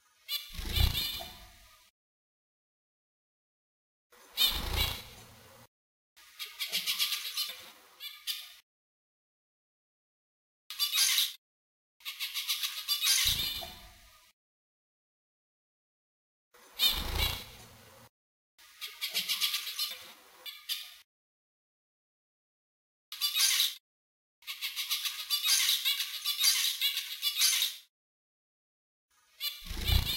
From summer 2008 trip around Europe, recorded with my Creative mp3 player.Crazy song from a friend's bird in Munich, Germany